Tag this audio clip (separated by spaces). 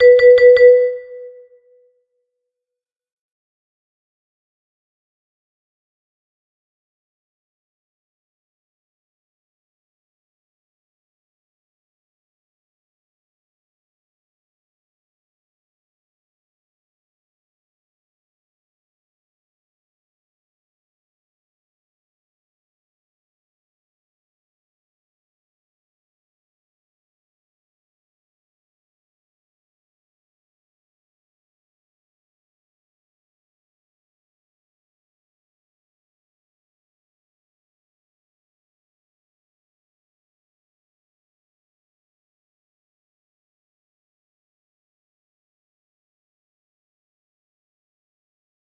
vibrophone quick text-noise text-notification four musescore phone text